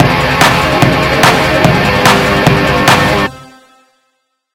A dance rock loop I threw together.
dance, hardcore, Guitar, punk, loop, metal, rock, drums